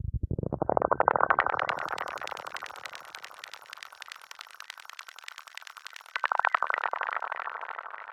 synth weird 1
just an analog synth at work
synth, sample, analog